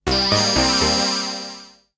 ob8-original 1
Oberheim OB-8 synthesizer chords.
chords, pads, synthesizer